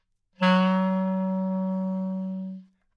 Part of the Good-sounds dataset of monophonic instrumental sounds.
instrument::clarinet
note::Fsharp
octave::3
midi note::42
good-sounds-id::3325
Intentionally played as an example of bad-attack-too-strong